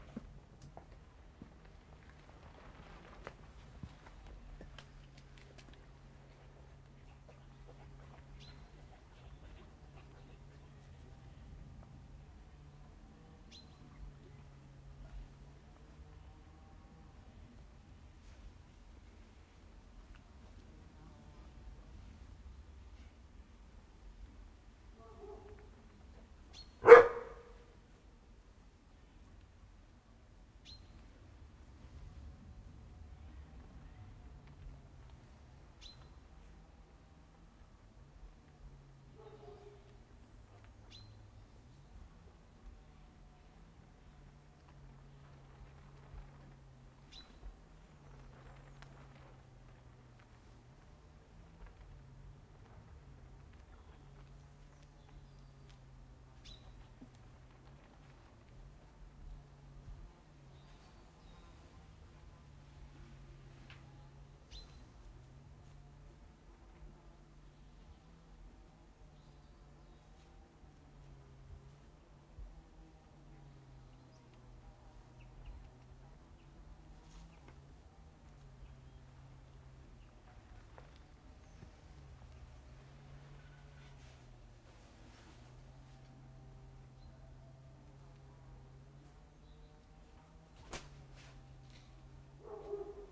stere-atmo-schoeps-m-s-village
outdoors village Bulgaria